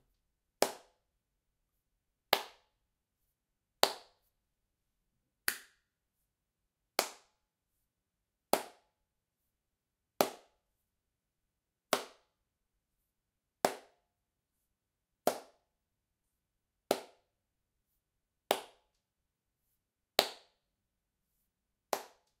Clapping medium
Clapping, Claps, medium